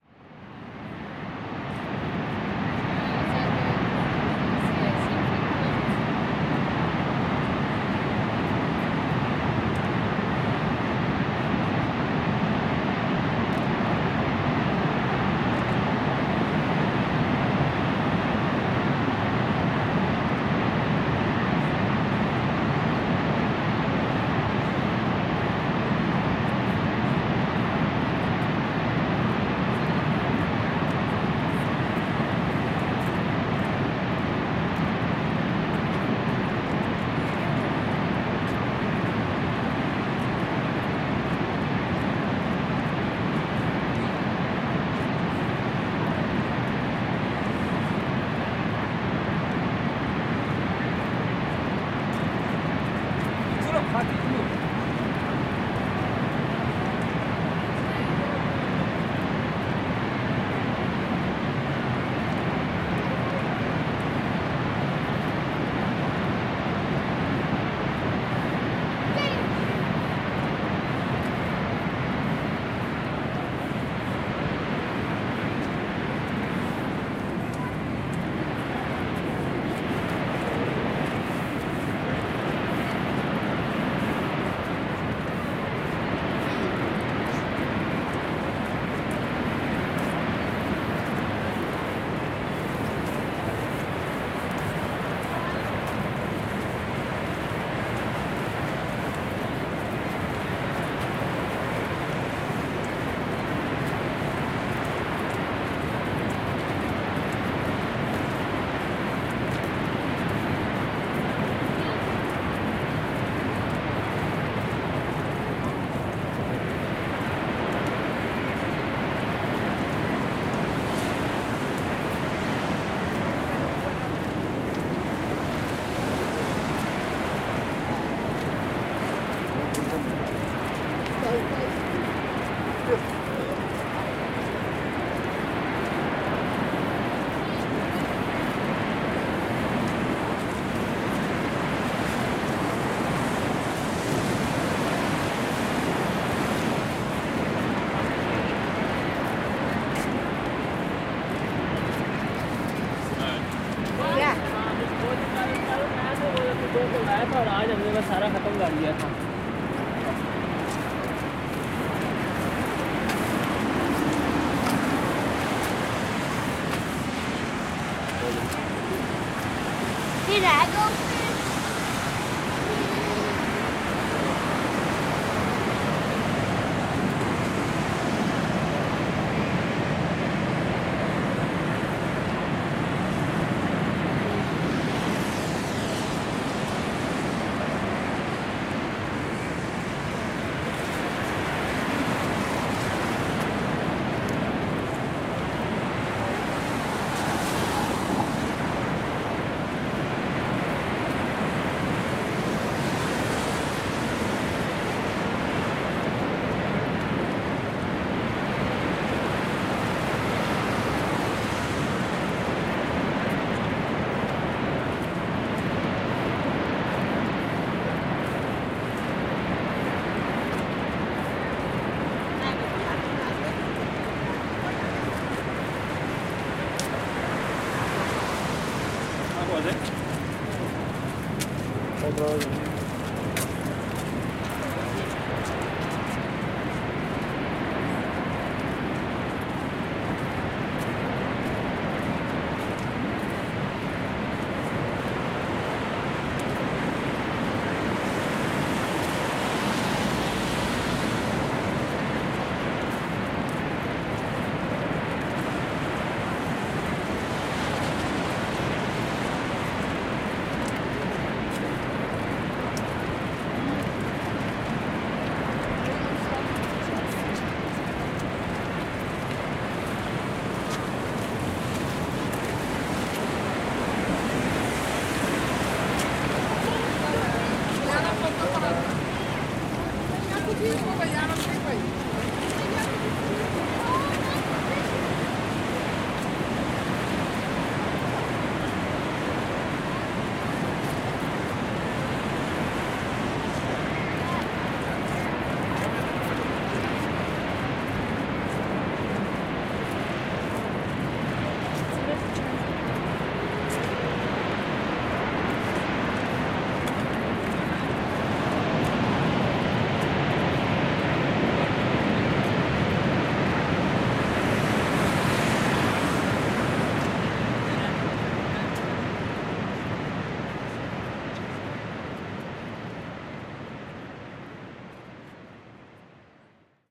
02.05.2017: noise of Niagara Falls (Horseshoe Falls) in Ontario, Canada.